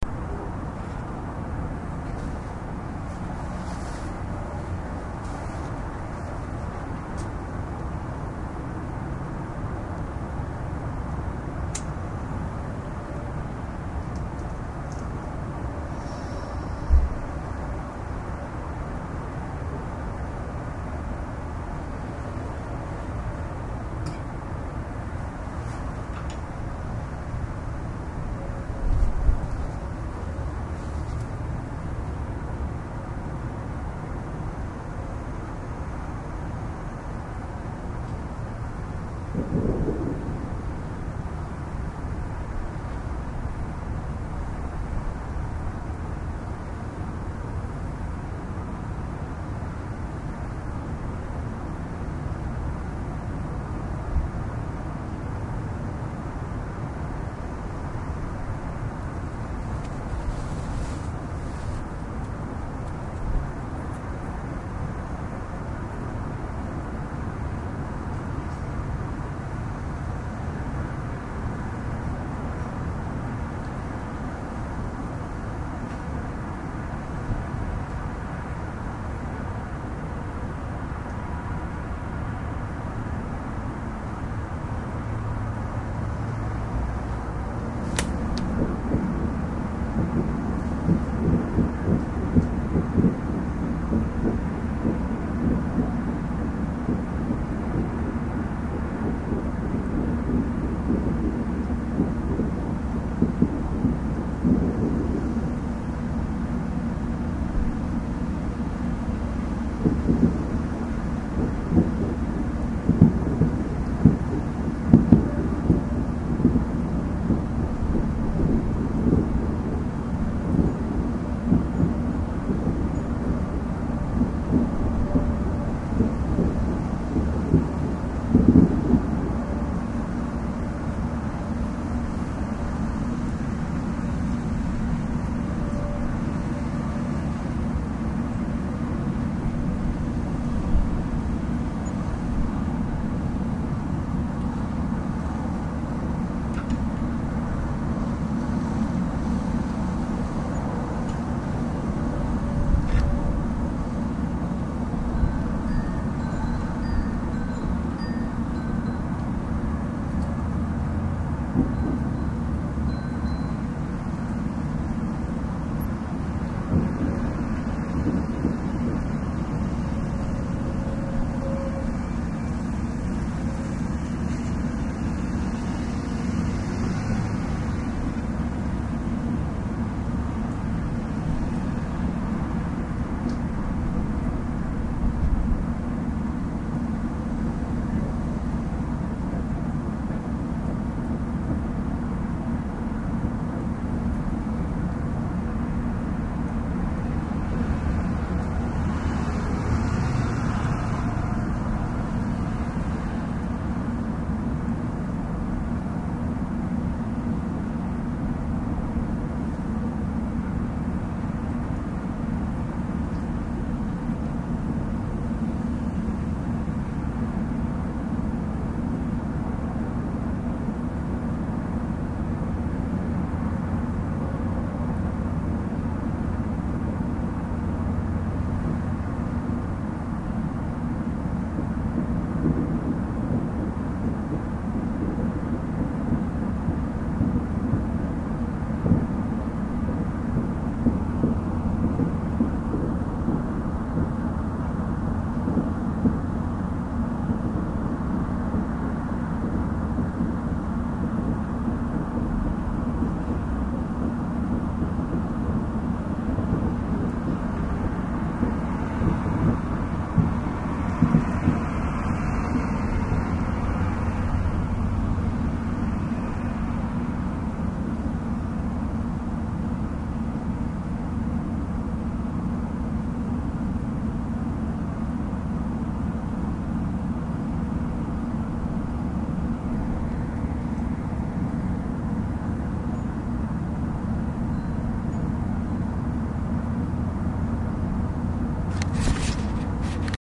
Sounds of distant fireworks and other suburban sounds recorded with a the Olympus DS-40.